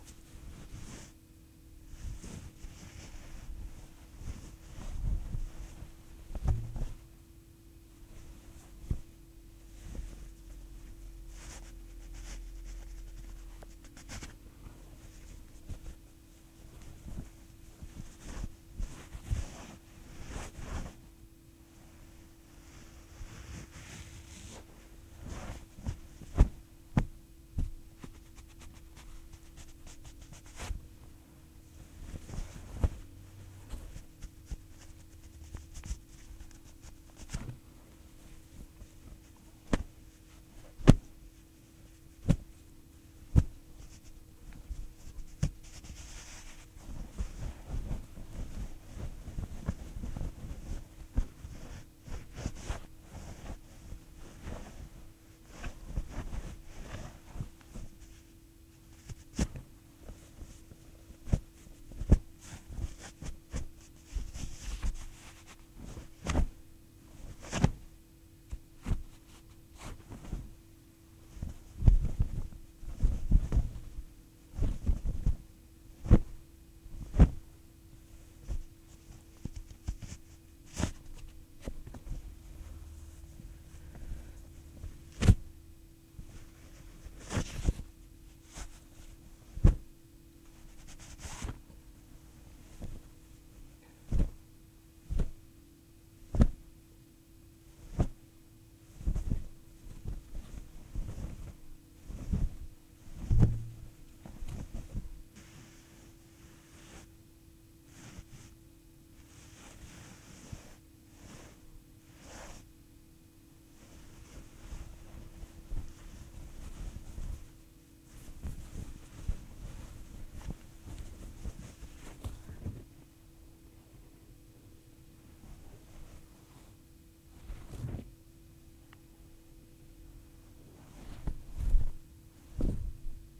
foley: blanket rustling
blanket, cloth, foley, motion